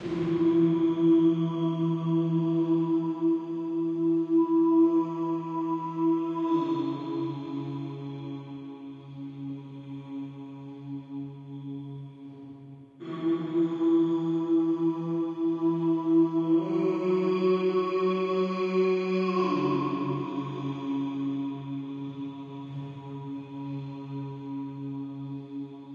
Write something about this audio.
Decided to test my ability in creating sounds and samples. I recorded my own crap-tastic voice and tuned it via melodyne (highly recommend the program btw). then threw on some simple reverb and created my own chorus effect as well and placed it on there. Hope you guys enjoy.